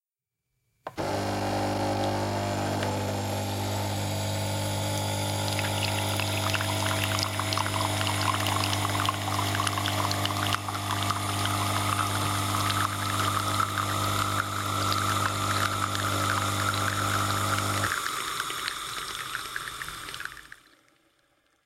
This sound is created with a record of a working coffee machine. I have applied a noise reduction, compression and normalize effect on the sound to make it clearer and eliminate the noise on it.
Then, I cut the introduction/outroduction and create a fade in/out.
Typologie de Schaeffer :
V’ - Pour le début du son avec l’impulsion
X - Pour le bruit de fond de la machine étant en marche
V’’ - Pour le bruit du café qui coule tout au long de l’enregistrement
Morphologie de Schaeffer :
Masse : son cannelé
Timbre harmonique : Terne
Grain : Le grain est assez rugueux
Allure : Le son est composé du vibrato de la machine
Dynamique : l’attaque est violente
Profil mélodique : Les variations sont plutôt glissantes
Profil de masse : /